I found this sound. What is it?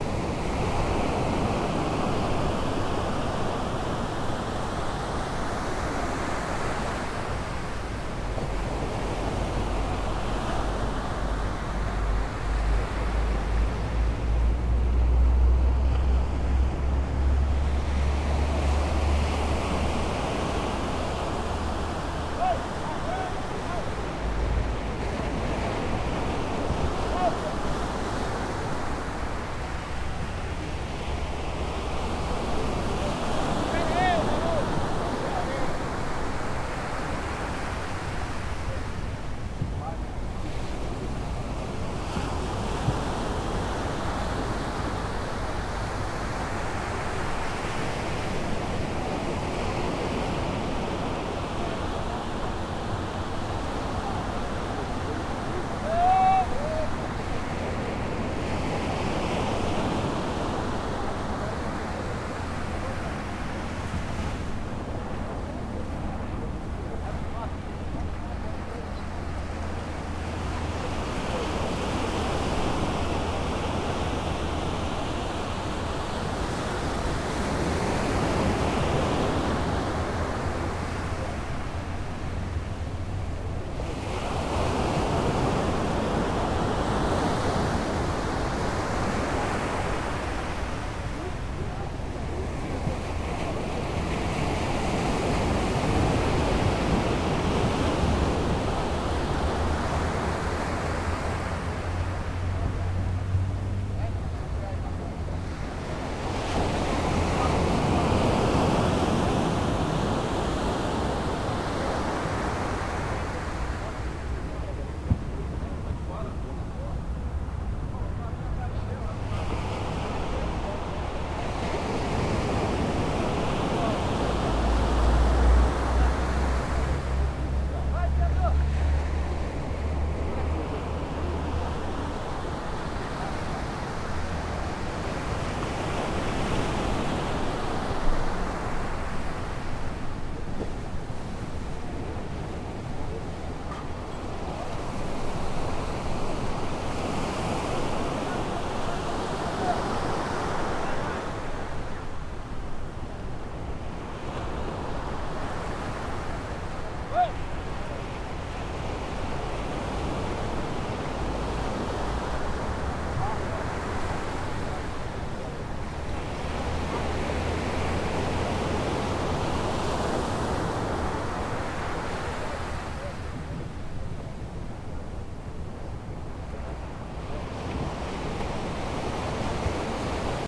Beach ambience
This sound was recorded at Copacabana beach, at Rio de Janeiro.
Only the sound of waves breaking in the beach can be heard most of time, but there are some fishers talking loud and screamming occasionally - there was a little boat arriving at the beach at 20 meters away from where I was recording.
I used a Saffire PRO 24 audio interface as a preamplifier and A/D converter, and a Tascam DR 100 MKII recorder to record the resulting SPDIF stream. The sound was recorded using a Sennheiser Me67 shotgun mic mounted into a MZW70 blimp windscreen with a MZH70 deadcat. The microphone was at a point 10 meters away from the surf line, pointed directly to the sea.
ambience, beach, sea